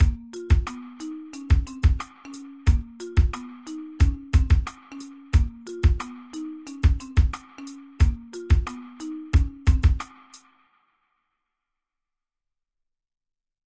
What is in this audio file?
Music for games by Decent.
Hip-Hop, drum, music, beat, rap, decent, game, kick